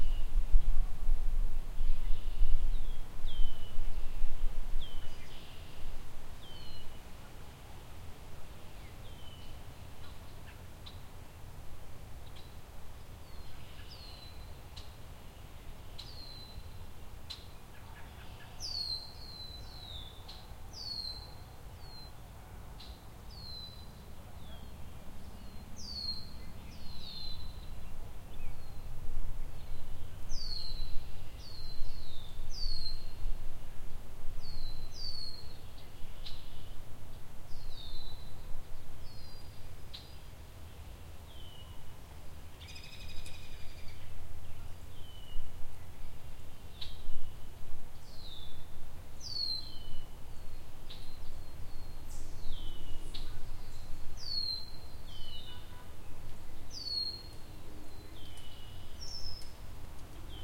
spring bird songs and natural park noise recorded just outside of Philadelphia, PA, USA, in May 2020.